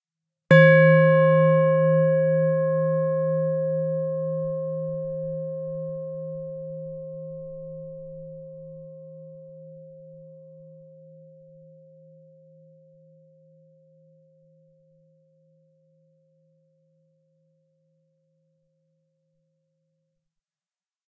Stainless Steel Lid 2
A stainless steel bucket lid struck with a wooden striker.
bell, ding, percussion, ring